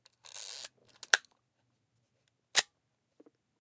Zorky camera close
An old Zorky 4 shutter sound.
Recorded with a Zoom H2n.
Normalized, hum and hiss reduction with Audacity.
sound-effect, shutter, Zorky, camera